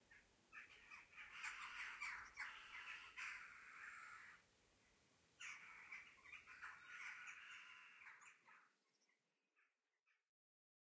Bird sounds from Holland
Without the traffic noise, multiple birds making sounds, from the netherlands.
Thank you for the effort.